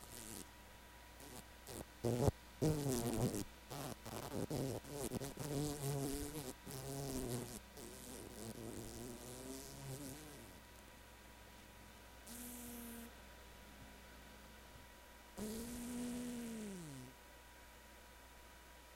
recording of a fly dying